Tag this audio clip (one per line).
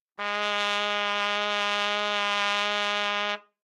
good-sounds
Gsharp3
multisample
neumann-U87
single-note
trumpet